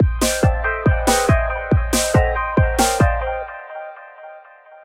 Some weird little loops made in FLS6... Kind of a mix between a simple slowed down dance beat and some odd percussionate sounds.